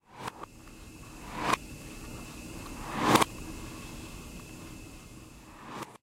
Computer Mouse Manipulated
Me dropping a computer mouse on a table manipulated by reverse function and speed/pitch changes.
computer, mouse, MTC500-M002s13